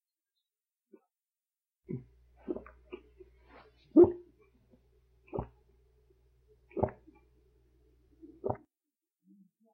Sounds of drinking, or swallowing.
use this for any of your drinking or swallowing sound needs.
gulp, drink, swallow